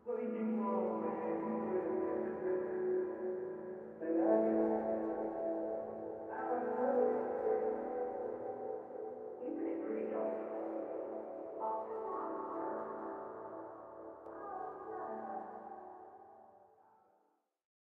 FX Resonator Vox
Me interacting with some sound processors
mysterious, processed, resonant, vox, murmur